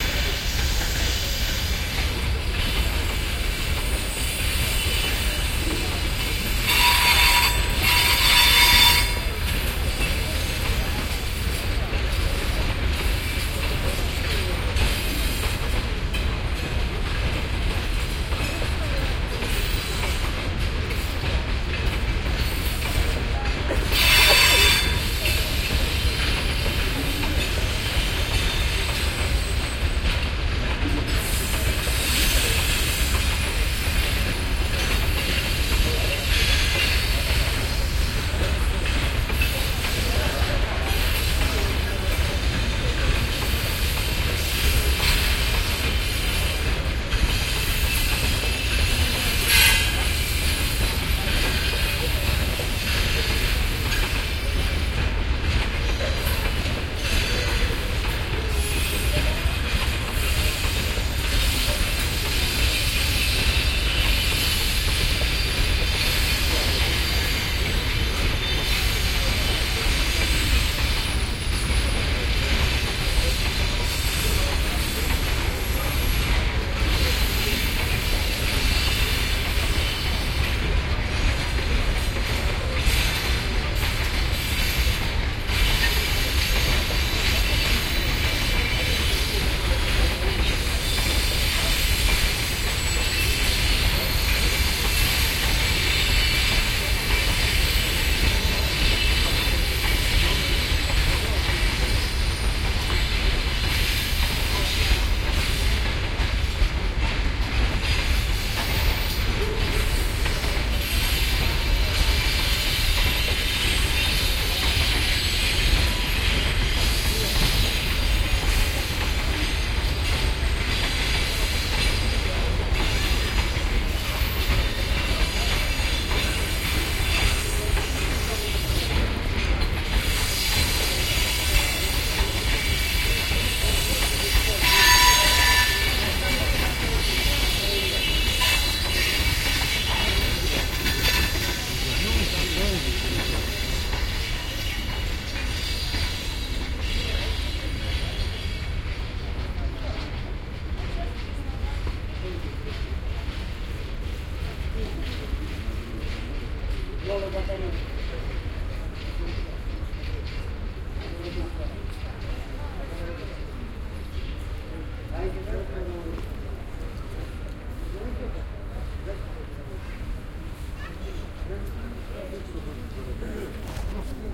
18-donetsk-train-station-nigh-freight-train-passing-by-in-distance
In the train station of donetsk a train passes by and then stops in the distance. You can clearly hear it's metal breaks against the metal of the tracks. People are talking in the background.
train, passing, station, donetsk, field-recording, freight